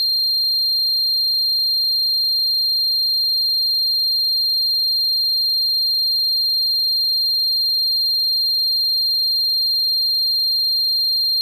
Sample of the Doepfer A-110-1 triangle output.
Captured using a RME Babyface and Cubase.